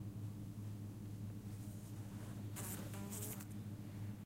Fly Buzzing Edited
This is of a house fly buzzing against a window trying to escape.
Animal, Buzz, Buzzing, Escaping, Fly, Insect, Inside, Irritating, OWI, Window